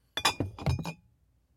Low resonance, glass bottle rolling, glass-on-glass tinging, medium to low pitch, bottles in a box.